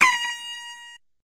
perc horn 3
the remixed samples / sounds used to create "wear your badge with pride, young man".
as suggested by Bram
printer, percussion, impresora, short